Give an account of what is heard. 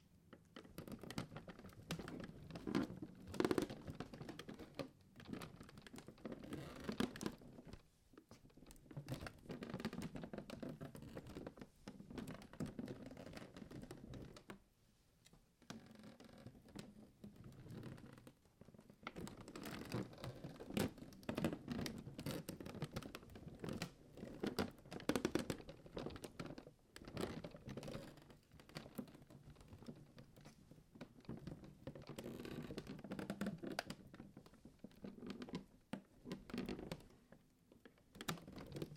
Foley of creaking floor boards, take 1.
I'd also love to hear/see what you make with it. Thank you for listening!